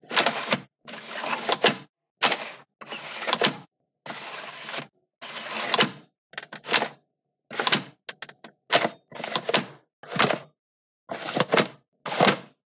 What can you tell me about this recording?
PS3 Disc Tray
Recoring of opening and closing playstation 3 disc tray
recording, Playstation, drums, zoom, Tray, sony, PS3, Disc, h5, opening, closing